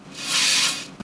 Blade sharpening sound.
blade, knife, metal, scrape, sharp, sharpen